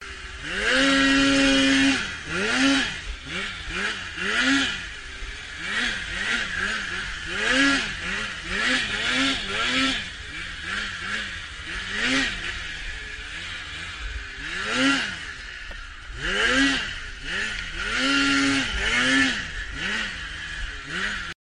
Sonido de una moto de nieve